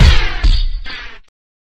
Viral Abstracted BD 01
Abstract, Noise, Industrial